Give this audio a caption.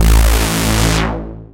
made in fl studio a long time ago
Sound, Synth, synthetic